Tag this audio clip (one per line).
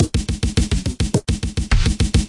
electronic
experimental
noise
industrial